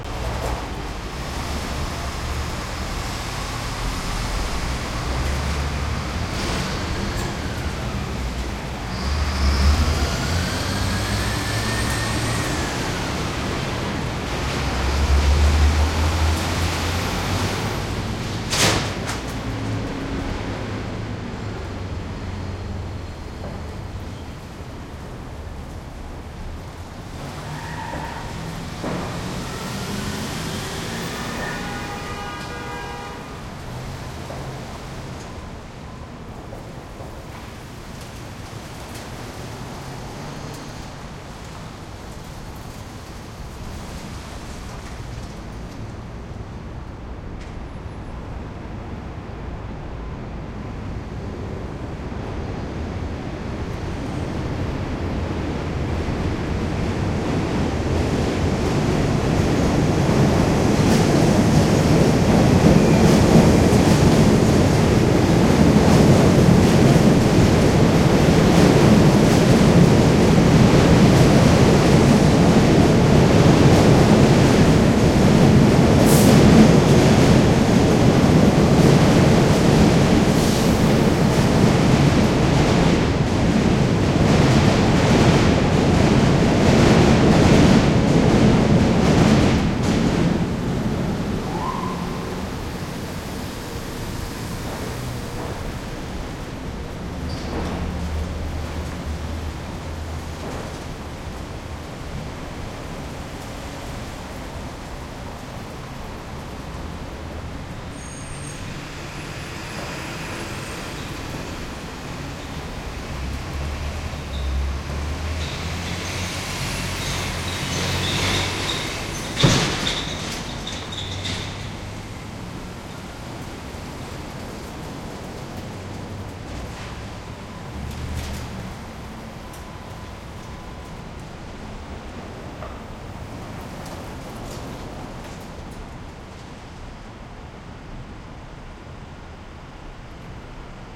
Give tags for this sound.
Brooklyn; bridge; heavy; overhead; pass; traffic; train; under

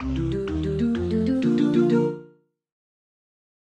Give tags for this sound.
finish; games; videogame; game; level; yay; video-game; win; end; voice; fanfare; video

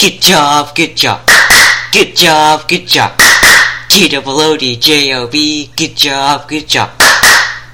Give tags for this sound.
recorded; voice; singing; song; appropriate; catchy-song; good-job; sing; catchy

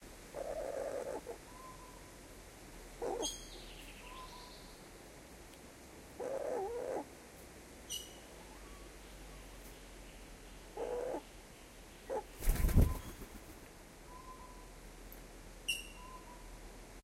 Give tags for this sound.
cooing; grunting; kookaburra

